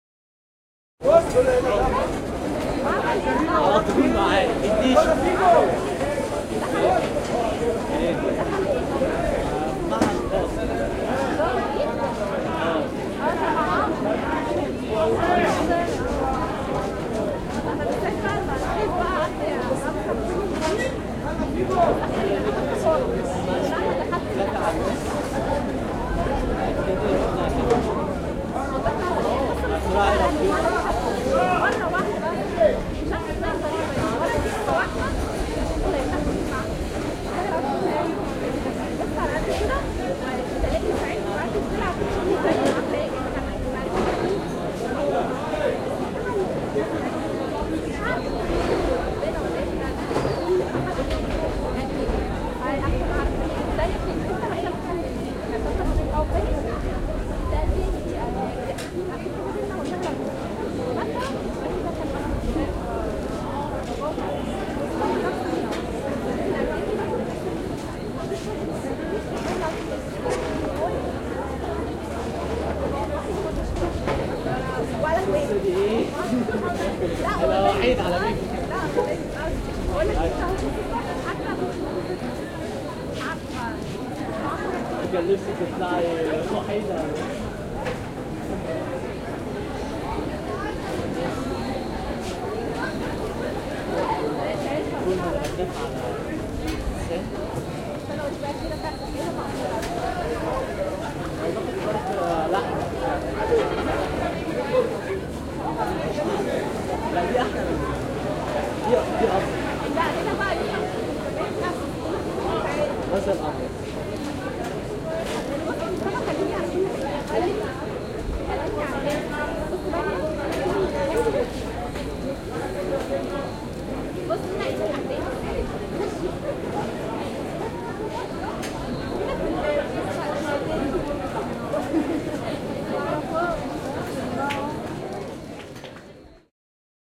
Students lunchtime
2014/11/23 - Alexandria, Egypt
12:30pm - University neibourghood.
Students having sandwiches for lunch.
XY Couple with windscreen